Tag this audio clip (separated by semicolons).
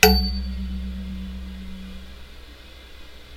dong; knock